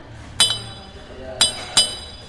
metal hitting metal tube
the sound of me hitting a long, cylindrical bell-like metal structure with a piece of metal tubing. recorded by a SONY Linear PCM recorder, alternately placed right beside the bell, then underneath (almost inside) it.
metal, ring, tube, ai09